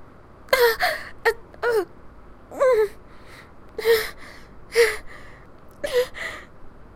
Woman in Pain 1
A woman in pain. No speaking, just sounds.
hurt, voice, suffering, woman, vocal, female, girl, ouch, pain